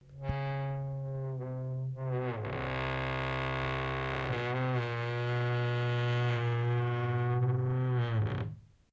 creaky door 2
A creaking wooden door (with metal hinges.) This sound is a long drawn-out low-pitched creak. Recorded in stereo using a H2n.
Creaky, closing, long, Stereo, Wooden, Creaking, low-pitched, Creak, Door, Spooky, slow, Hinges